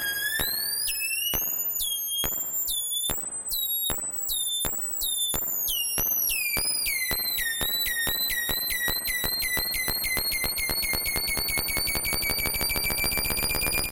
Sounds made with the legendary VCS3 synthesizer in the Lindblad Studio at Gothenborg Academy of Music and Drama, 2011.11.06.
The character of the sound is something between a bouncing ball and a Kraftwerkish bird.